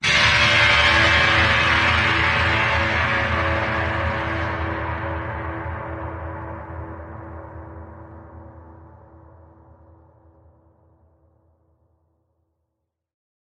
A thick power chord like stab. Good for introductions, a sense of surprise, exclamation point.